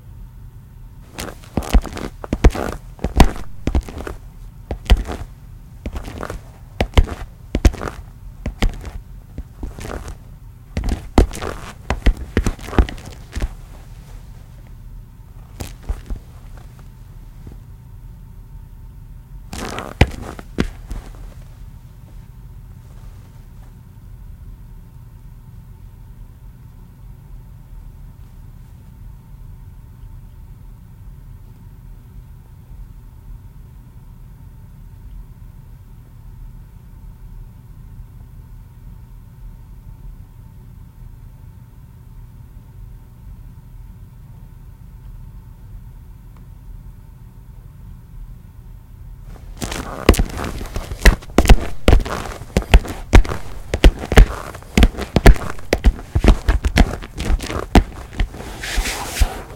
walking footsteps loafer shoes tile floor 3
A man walking on tile floor in shoes called loafers (work shoes). Made with my hands inside shoes in my basement.
loafers, shoes